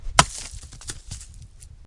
rcok falls 02
rock hits the ground
falling, hit, rock, stone